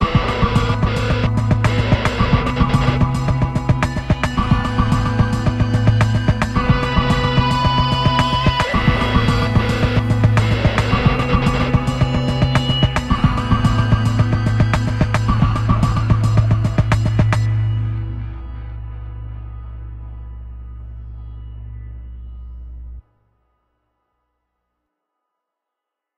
a loop (needs to be cut) or as is at 110 bpm
110bpm, distorted, drums, guitar, loop, strange